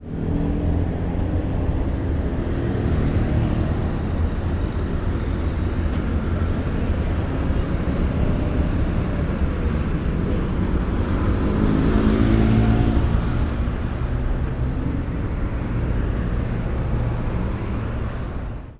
39-Ruido fondo calles
animation, office